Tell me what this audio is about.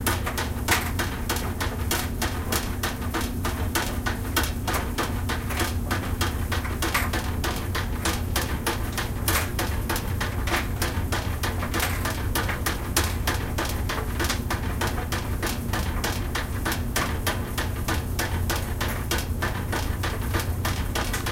My dryer making rhythm :p
Rhythm, Dryer